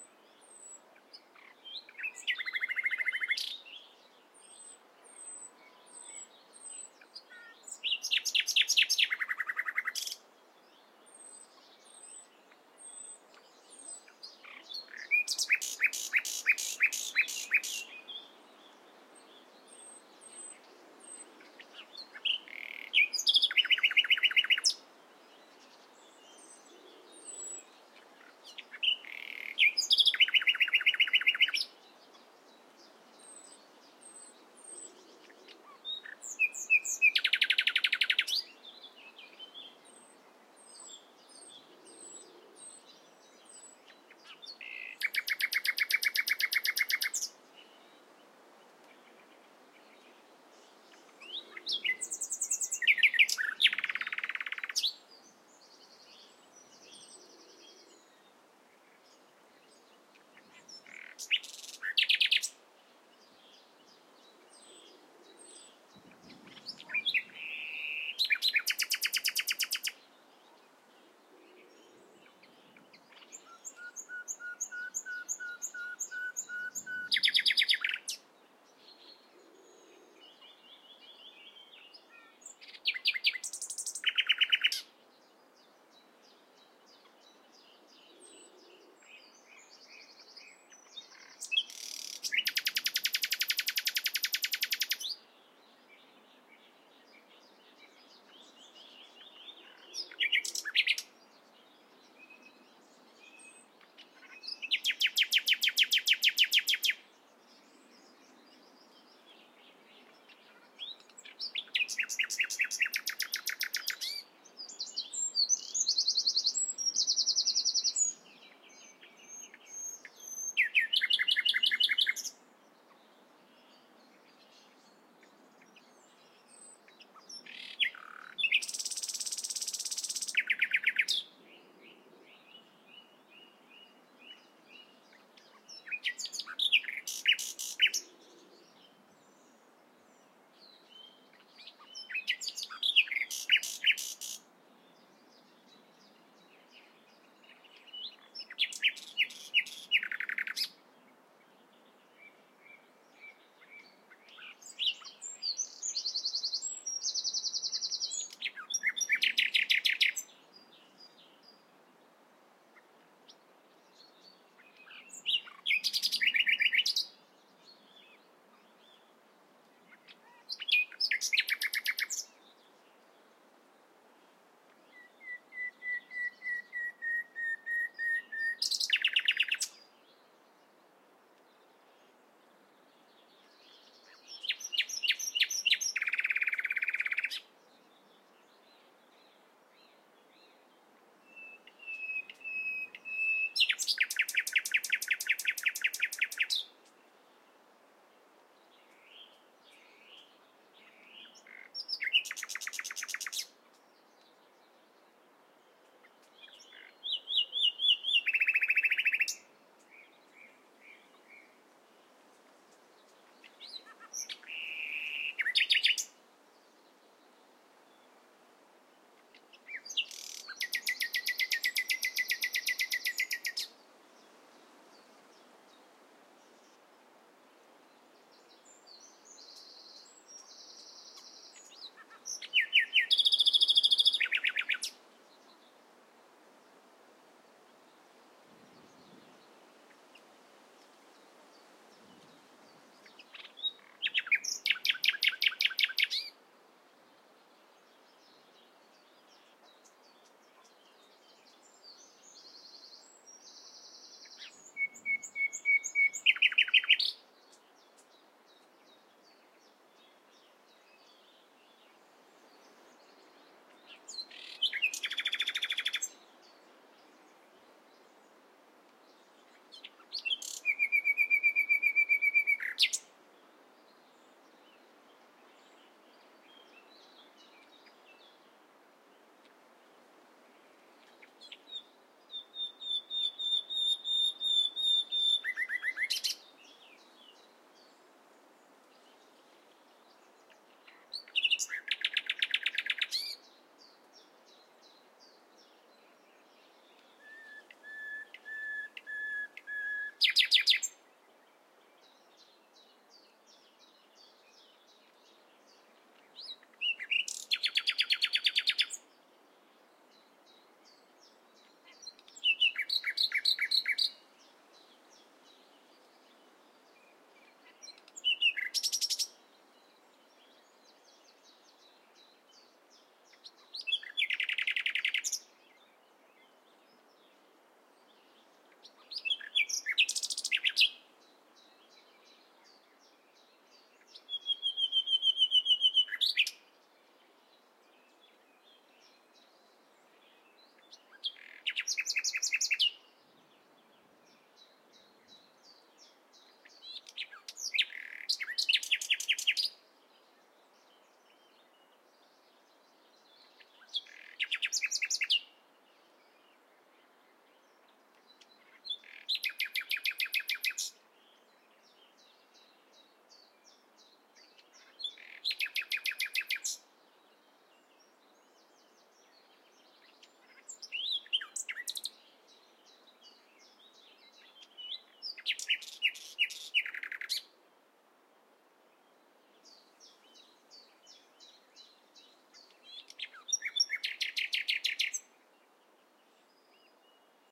Nightingale in full song. Recorded on the morning of the 6th May 2017. Some wind noise. The recording was made at Wrabness in Essex. The recorder is an Olympus LS-14 with a Sennheiser MKE300 microphone on a tripod. Though I use Audacity for editing, this recording has not been altered.